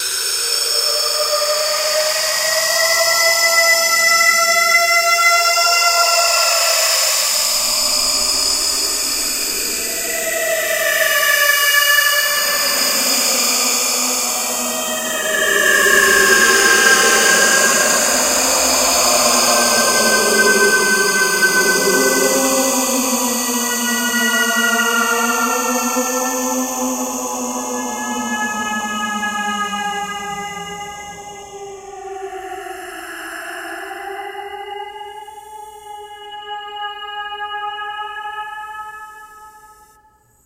Horror voices screaming and whispering
One voice screaming and two voices whispering in a spooky sound for use in horror scenes
scary, macabre, voices, horror-sound, spooky, suspense, terrifying, freaky, terror, horror, creepy